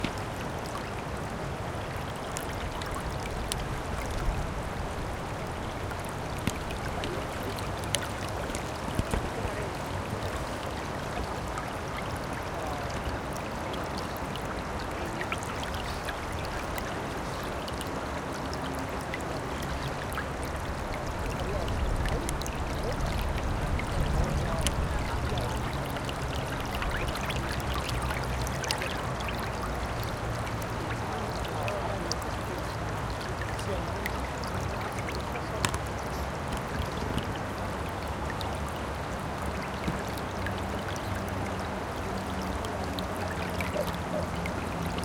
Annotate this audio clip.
20211010 RiuBesòsLleraIlla Traffic Nature Water Nice Pleasant Quiet
Urban Ambience Recording at Besòs River by the riverbank, in front of the island, Barcelona, October 2021. Using a Zoom H-1 Recorder.
Nature, Nice, Pleasant, Quiet, Traffic, Water